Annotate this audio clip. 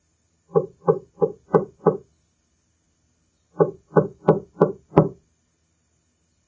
Knock wood 10 times
Knocking on the wood surface 10 times
knocking, wood, knock